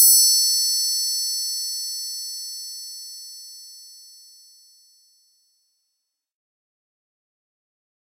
Mini-Cymbale-C3-1
Clean and long synthetic mini-cymbal in C (hi octave) made with Subtractor of Propellerhead Reason.
drums, percussion